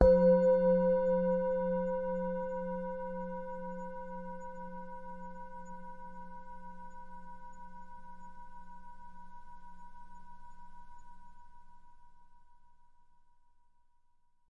singing bowl - single strike 5
singing bowl
single strike with an soft mallet
Main Frequency's:
182Hz (F#3)
519Hz (C5)
967Hz (B5)